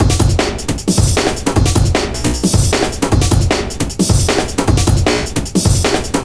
drumbeat composed step by step in fl studio sequencer (patterns)
+ dbglitch vst effect